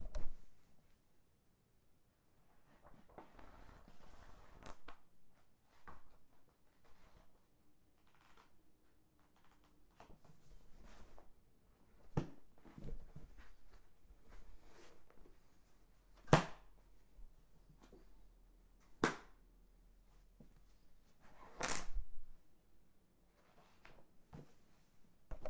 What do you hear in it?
Book opening
book, books, library, page, pages